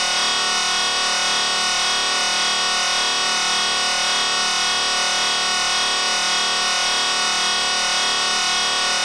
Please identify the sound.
Saw Fx
abstract, digital, effect, electric, experimental, future, Fx, noise, sci-fi, sound, sound-design, soundeffect